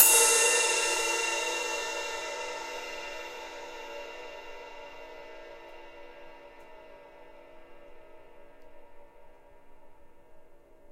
Ride cymbal from my friends neglected kit.
drums, live, percussion